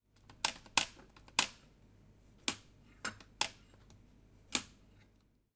Barista taking the shots from the coffee grinder.
Microphone: Zoom H4N Pro in XY 90° set-up.